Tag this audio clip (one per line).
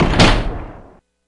door
factory
industrial
machine
machinery
robot
robotic